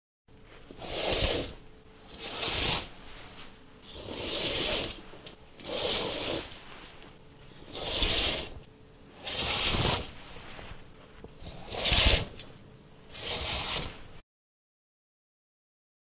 Curtain on rail
A curtain on a rail, opening and closing
film, rail, curtain, OWI